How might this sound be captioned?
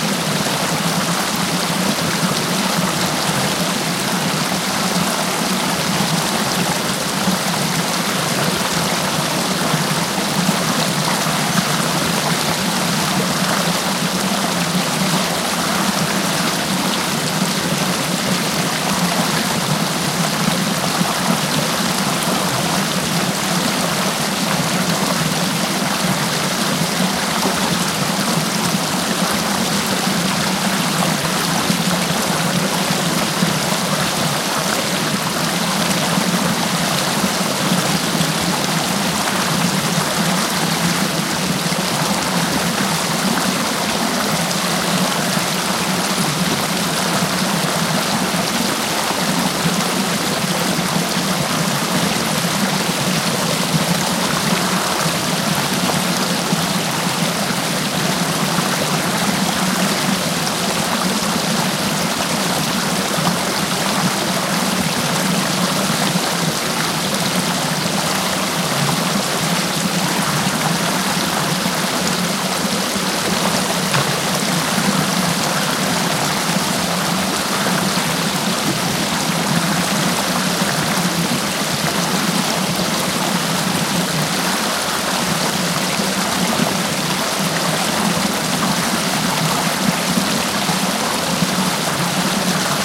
Sound of a small river flow, field recording - April 15th 2020, Occitania, South Of France